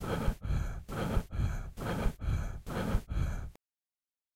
breathing heavy
Heavy breathing for a game character